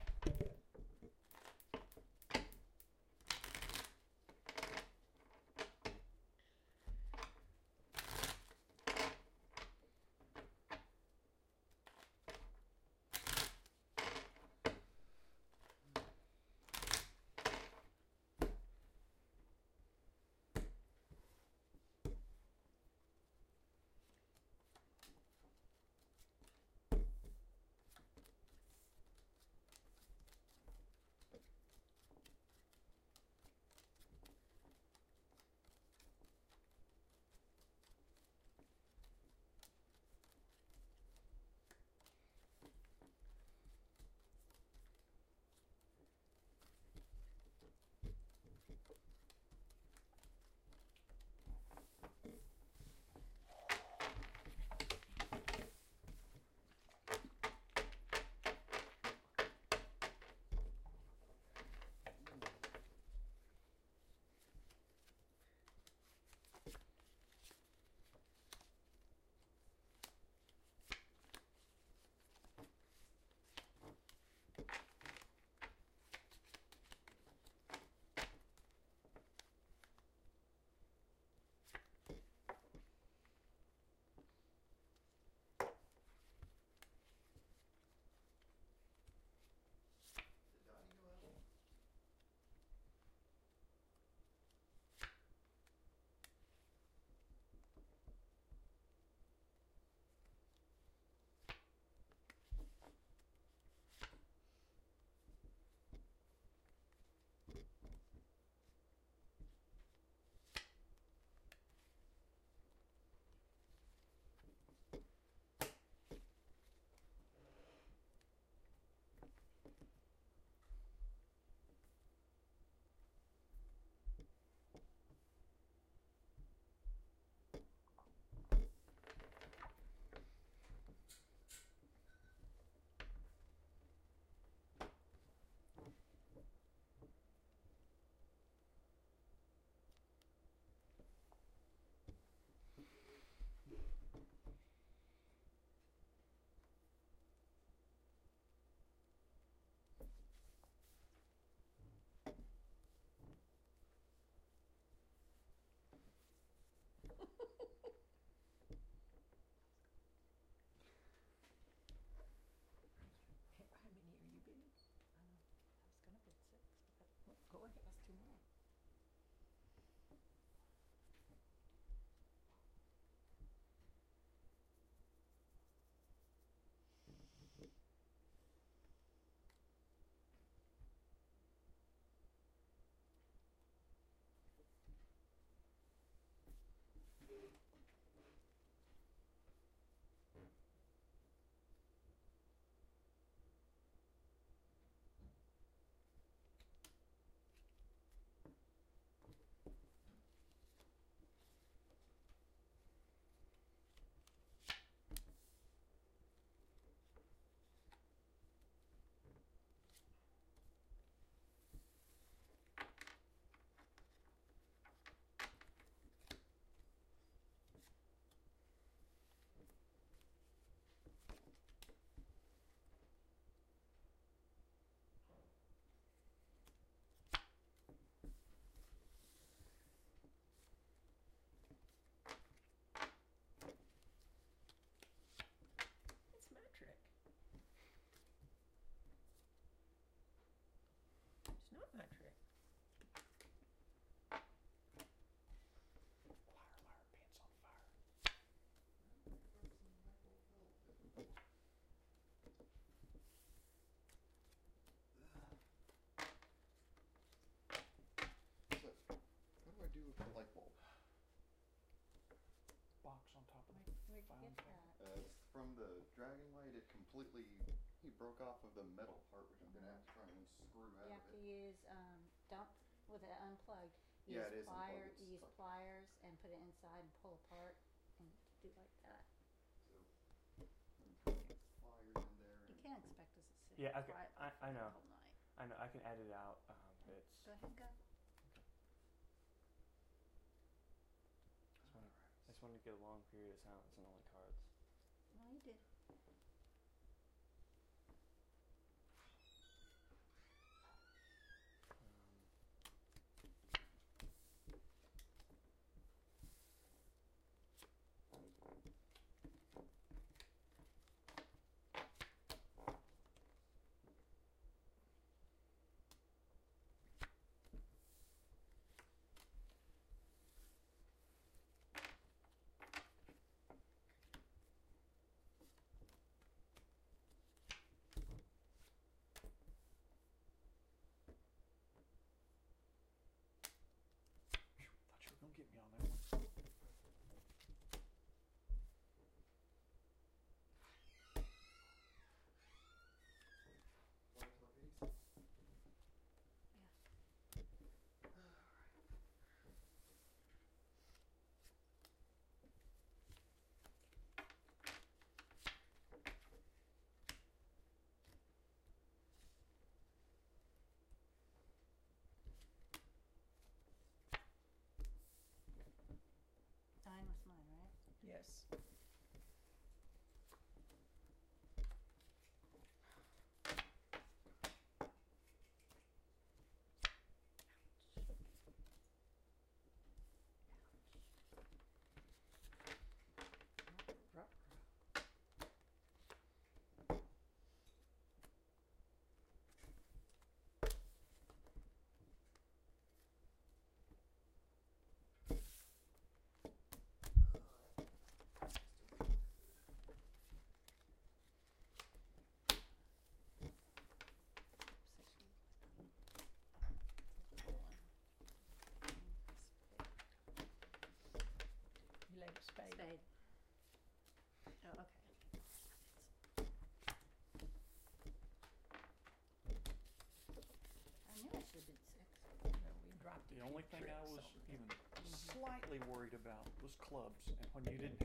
Quietly playing cards
A group of people quietly playing spades
cards, games, spades